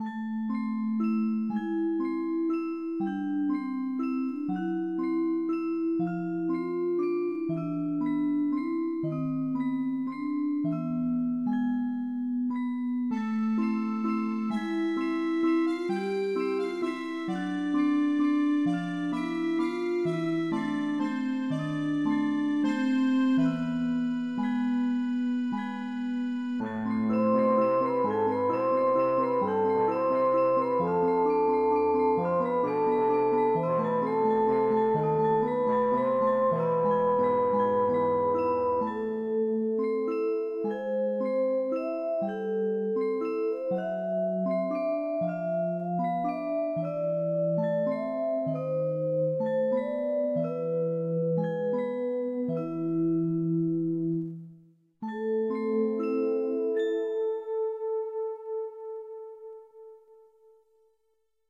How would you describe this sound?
French Ditty
Short intro/outro tune I created- suitable for melancholic film. Has a French vibe.
jingle, musical